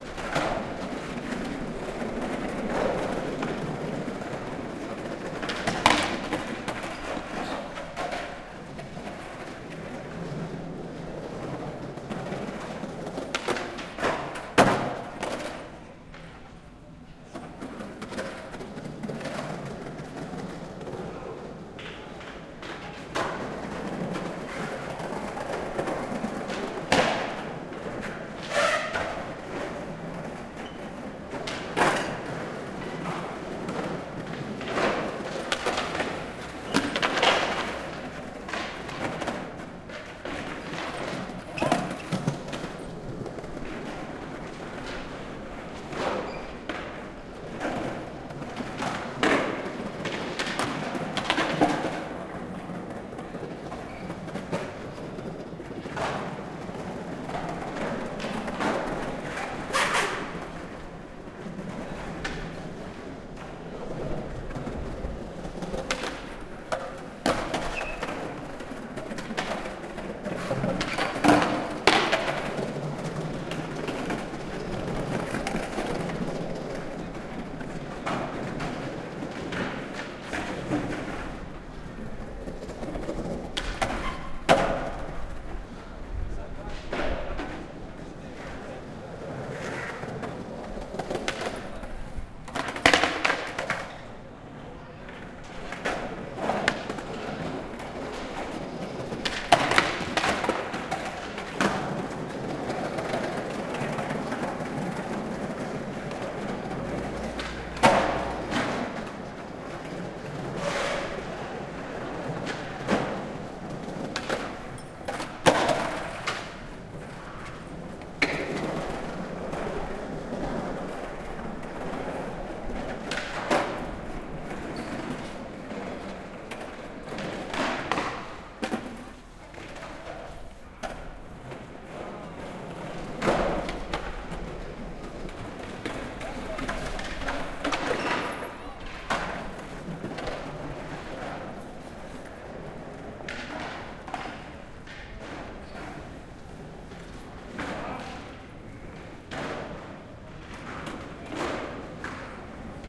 skater at southbank
Skaters practising at London's southbank centre. Recorded on Zoom H4 with on board mikes